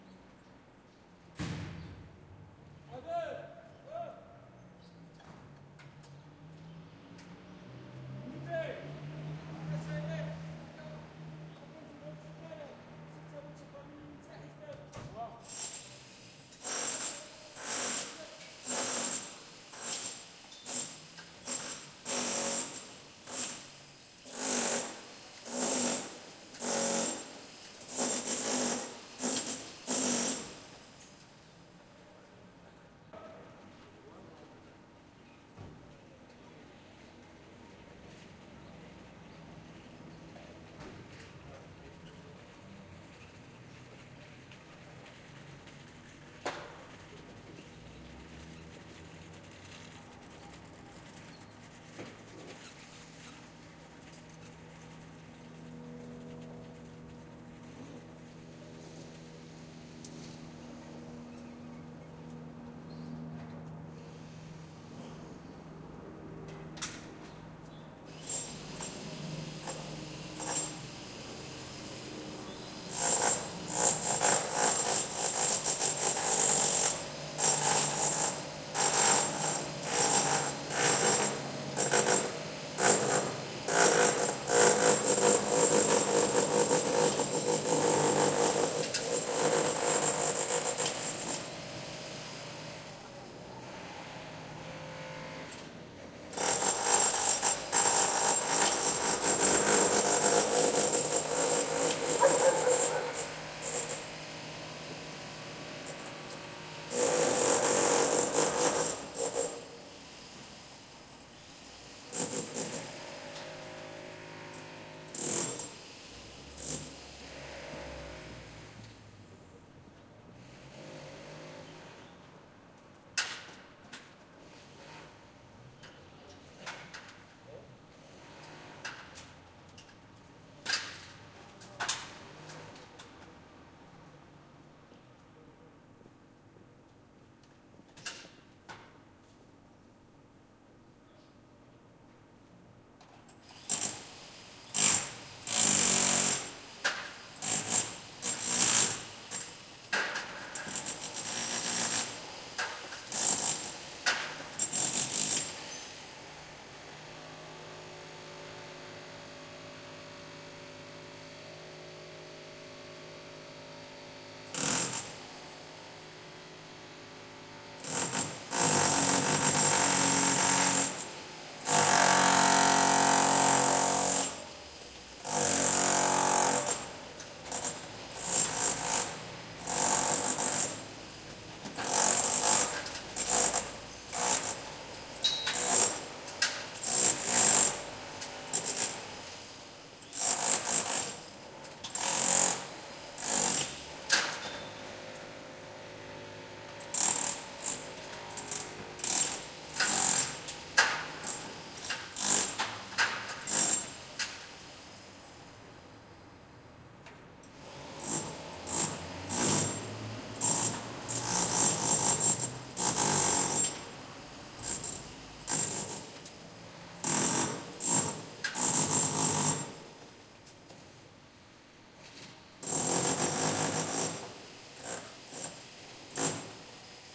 streetlife workers 1
Workers in the facade of a building (Barcelona). Recorded with MD Sony MZ-R30 & ECM-929LT microphone.
street, workers